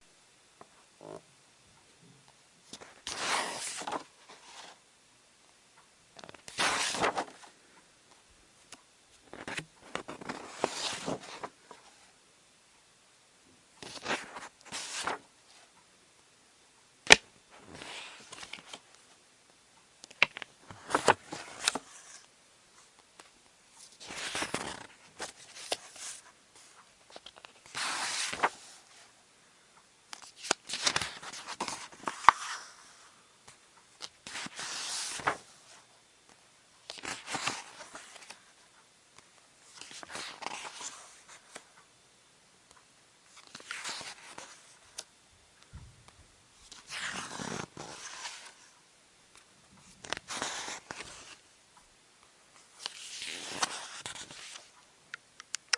Flipping book pages #1
Flipping the pages of a large, hardcover book. These pages are a little bit quieter than my second recording. Many pages are turned to make sure that you get the right sound. I didn't leave a lot of space in between each turn, but that can be added in.